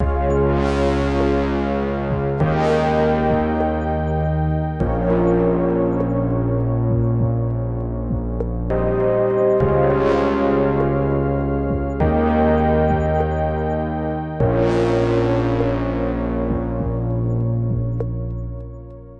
Gulped Opus
F, reasonCompact